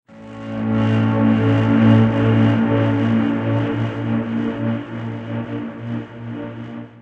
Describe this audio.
An acoustic guitar chord recorded through a set of guitar plugins for extra FUN!
This one is just plain ol' A.